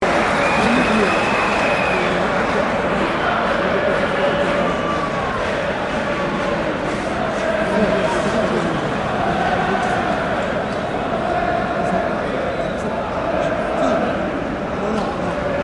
ale ale Benfica
The sound of a SLB's music in the stadium.
benfica, fans, football, game, goal, match, SLB, sport, stadium